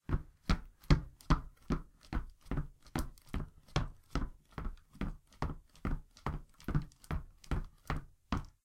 Running Shoes (2)
Some sneakers running on a hard surface